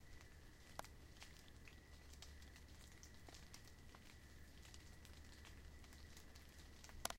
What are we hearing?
Defined Rain Pitter Patter
A short capture of rain hitting pavement and leaves in the surrounding trees during a California drizzle. The bigger drops were accumulating on tree leaves and falling on the pavement.